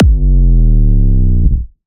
BIG ROOM KICK
Just a simple big room house style kick with a quick punch and lots of tail. As far as I know from Ableton, it's in the key of A#, if you can find the exact key just comment and I'll fix the description.